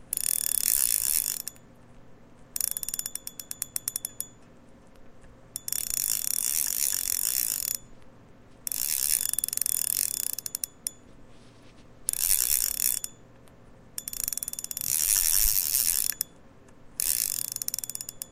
Whirr of a fishing reel
reel, rod, fishing, roll, click, stereo, whirr